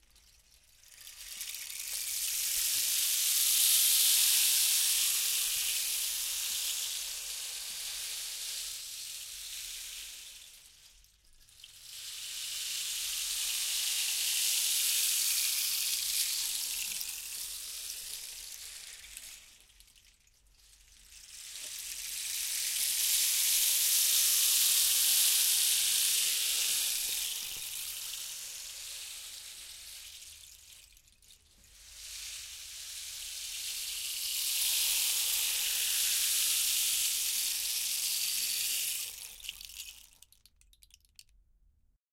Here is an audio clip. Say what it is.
Rainstick (Stereo)
Rainstick recorded with a stereo matched-pair of AKG C-214 microphones in a studio.